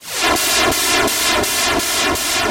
8 bit shimmybomb.
bit, synthesizer, 8